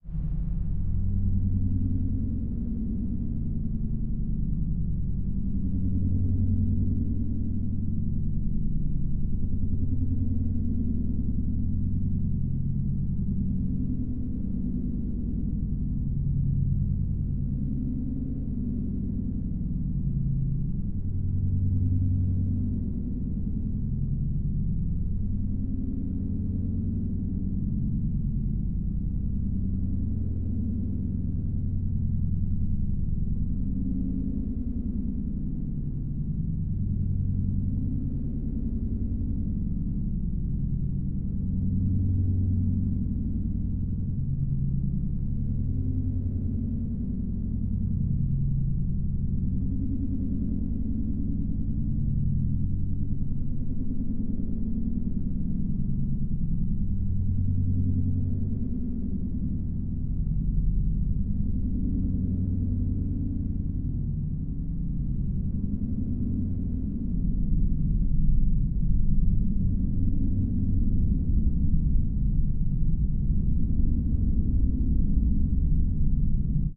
Made this Serum patch and used it to create the sound of a spaceship flying by. The results can be found in this pack.
I uploaded this source material before panning and distortion, so you can build your own fly-by. If you want the same distortion settings, just add Tritik's Krush plugin, use the init patch and turn up the Drive to about 60% and Crush to 30%, adjust to taste. Automate the Drive parameter to get that rocket exhaust sound!